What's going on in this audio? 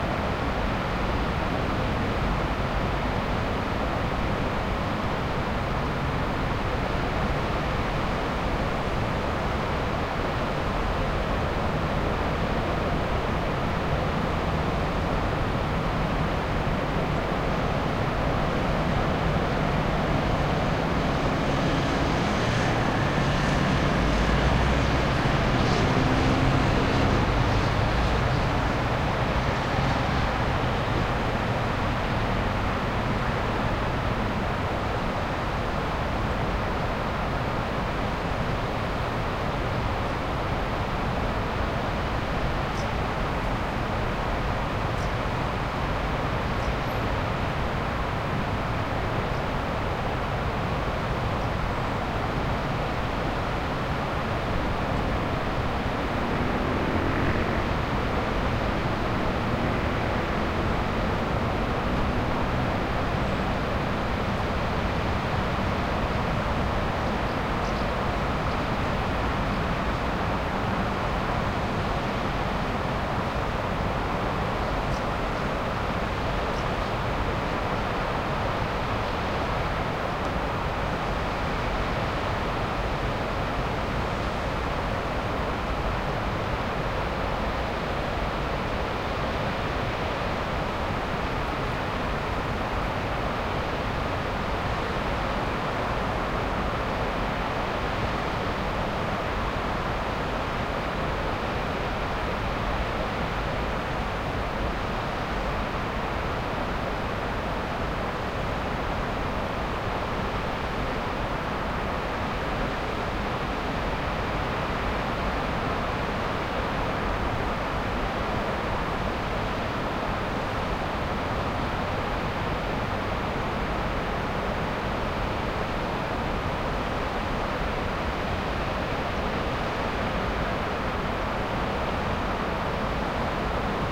Far ambiance at Luzech
This ambiance has been recorded at Luzech (France) on a hill, far from a bit everything. You can hear the Lot (river) making some filtered white noise.
ambience, ambient, distant, far, field, recording, river, town, village, waterstream, white-noise